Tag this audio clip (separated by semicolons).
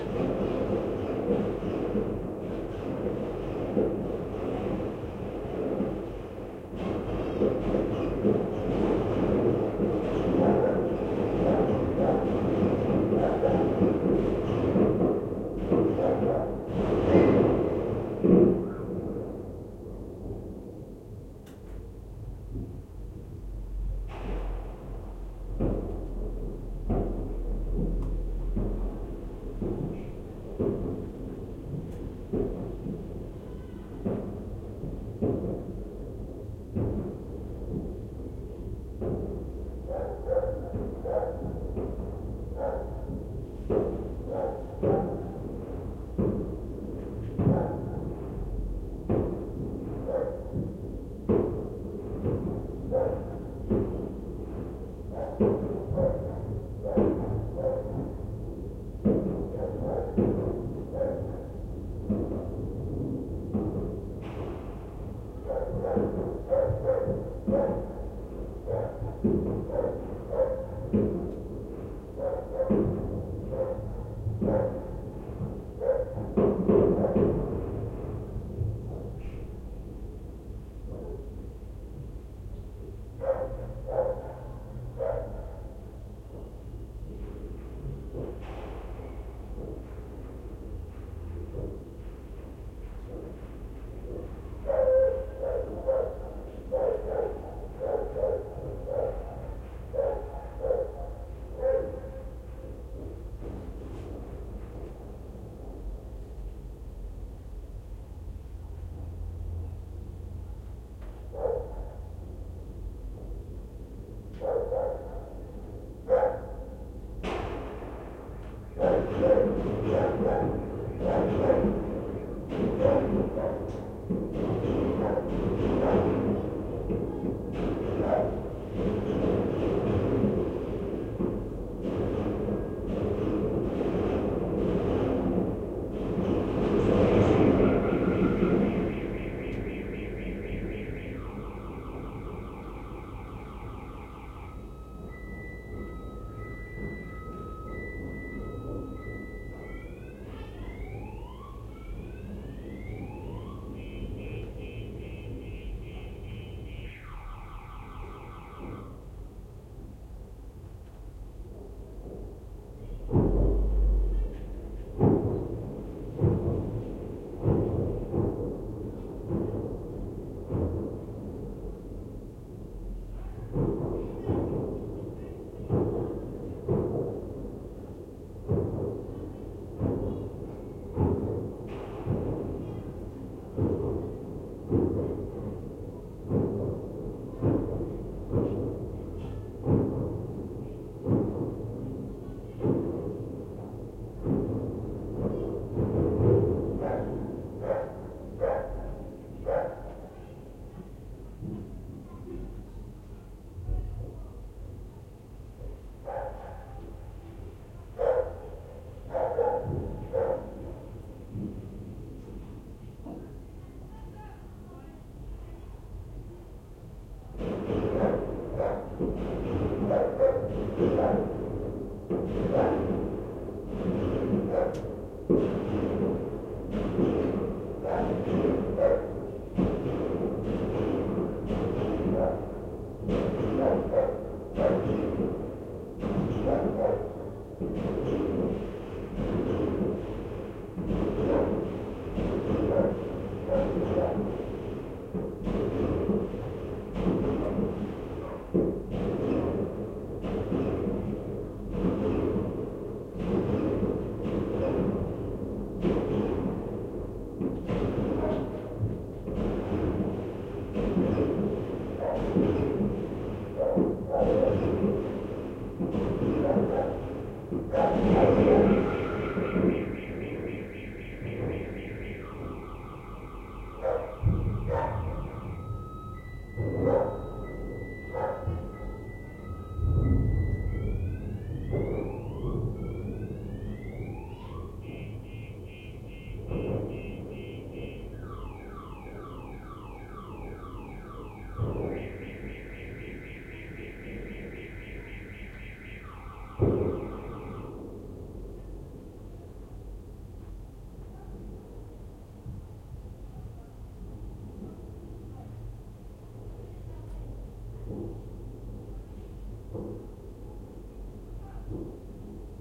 crowd; dog; fireworks; russia; moscow; alarm; celebration